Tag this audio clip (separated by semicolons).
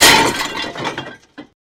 debris; shatter; smashing; recording; breaking